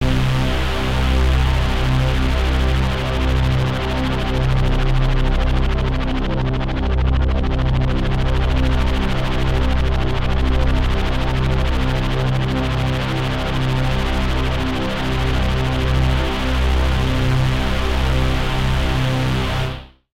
A dynamic gong. Created using the Venom Audio Synthesizer. Recorded and processed with Sony Sound Forge 10.
Techniques used:
-Analog subtractive sound synthesis
-Signal processing